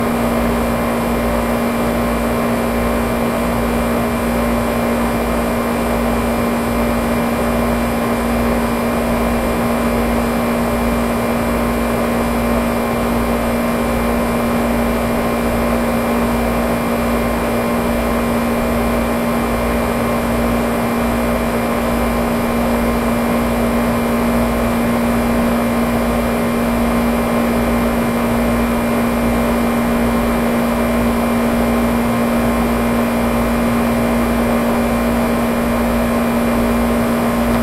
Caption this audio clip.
Air Conditioning Unit 2
Outdoor air conditioning unit recorded from within a sock to guard against wind.
ac, air, conditioning, electrical, electricity, hum, industrial, industry, machine, mechanical, tone, unit